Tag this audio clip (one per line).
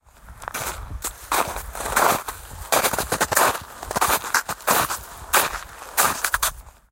walking
snow